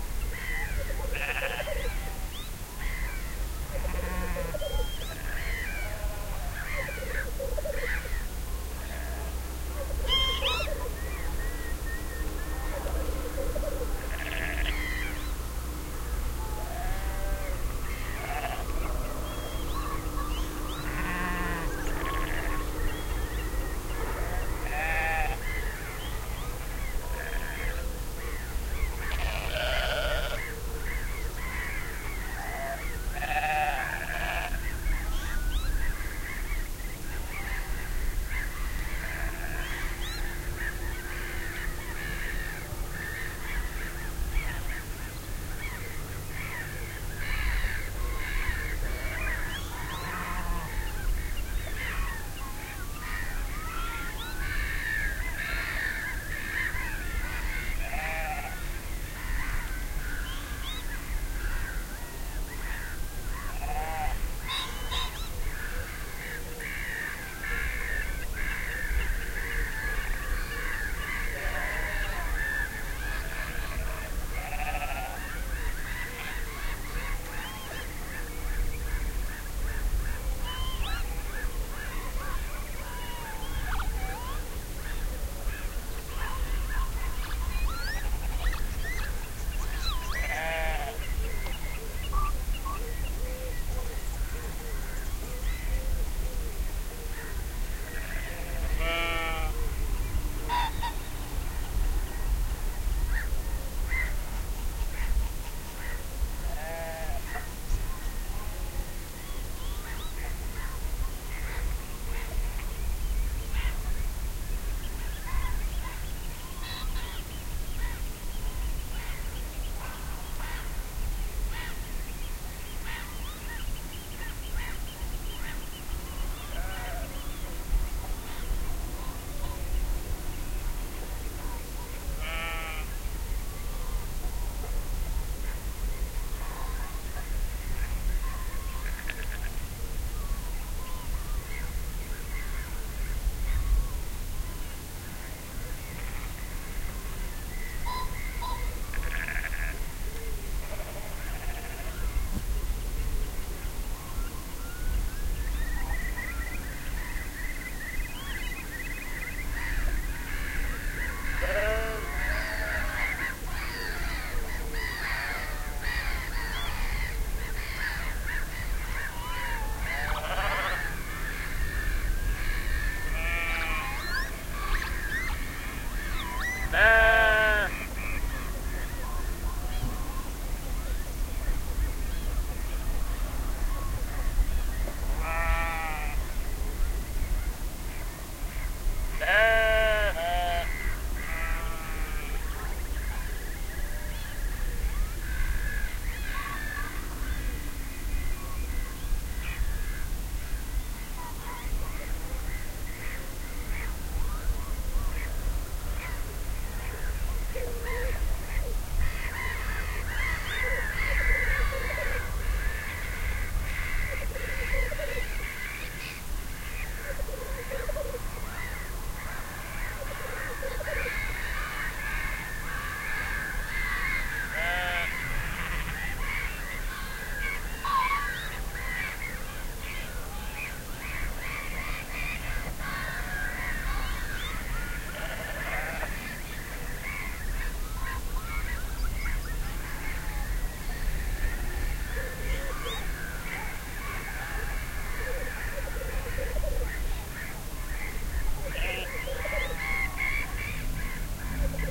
Scottish Highland
This is what I would call propper "field"-recording. This track was
recorded in the middle of April 2007 in Perthshire / Scotland. It all
sounds pretty scottish to me.
The gear I used was an AT 822 microphone with a windshield, a Prefer preamp and the Sony TCD - D 8 DAT recorder with the SBM device.